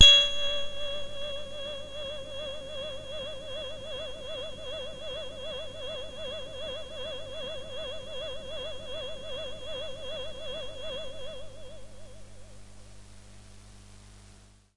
Noisy Mellowness - G#7
This is a sample from my Q Rack hardware synth. It is part of the "Q multi 007: Noisy Mellowness" sample pack. The sound is on the key in the name of the file. The low-pass filter made the sound mellow and soft. The lower keys can be used as bass sound while the higher keys can be used as soft lead or pad. In the higher region the sound gets very soft and after normalization some noise came apparent. Instead of removing this using a noise reduction plugin, I decided to leave it like that.
bass, electronic, lead, mellow, multi-sample, soft, synth, waldorf